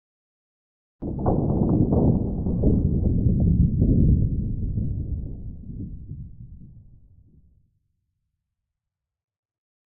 Thunder sound effect. Created using layered sound of rustling baking paper. Paper was pitched down, eq'd and had reverb added.